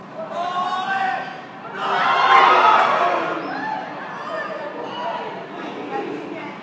in the heat of night (air conditioners noise can be heard) people cheer the victory of the 2008 European Football Cup by Spain, on June 29th.